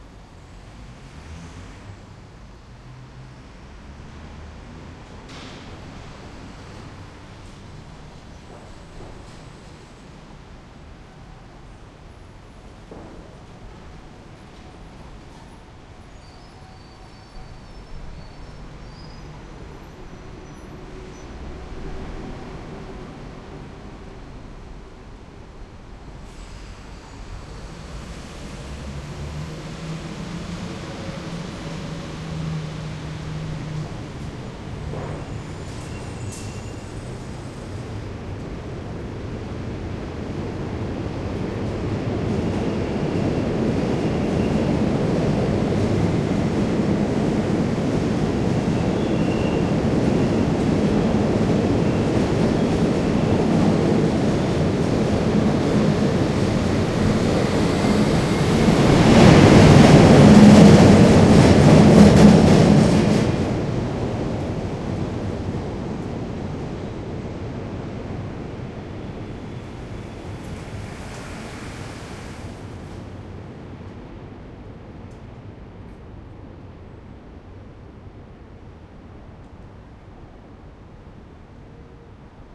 tunnel under Brooklyn bridge subway pass overhead NYC, USA
subway, tunnel, overhead, under, USA, bridge, Brooklyn, pass, NYC